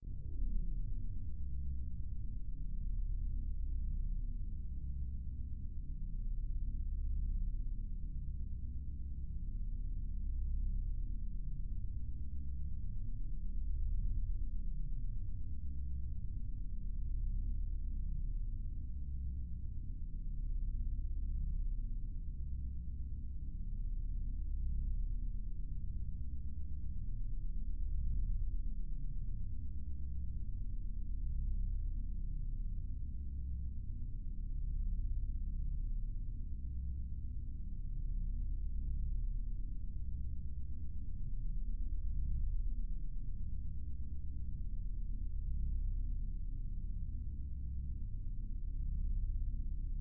Spaceship Engine - just noise
Deep Spaceship Engine Background Noise.
electronic, humm, noise, future, computer, soundeffect, beep, computing, sci-fi, digital, space, engine, vintage, sciencefiction, effect, synth, soundesign, spaceship, scifi, fx